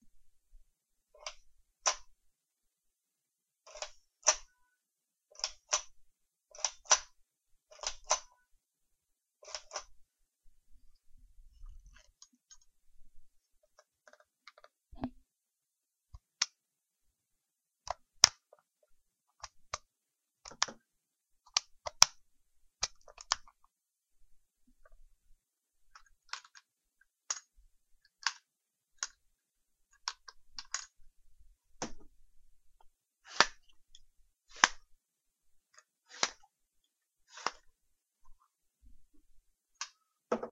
gun sounds cocking/safetry switch/handling
This is a collection of sounds, of me cocking the gun, clicking the safety off and on and to semi auto and full auto and gun handling sounds. enjoy!!!
cocking, safety, airsoft, gun, rifle, handling